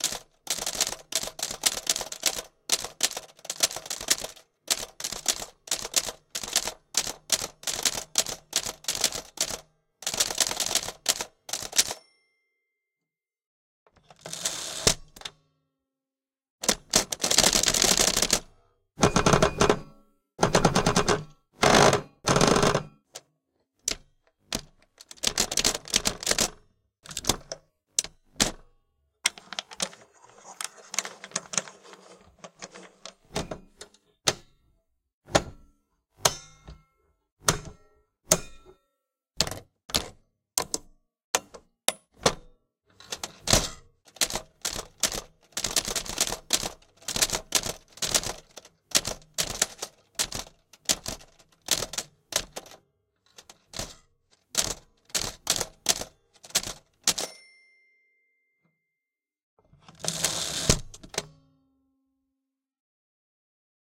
A full range of sounds produced by an old German Mercedes typewriter.
mercedes, click, ding, type, vintage, mechanical, keyboard, typewriter, clunk, typing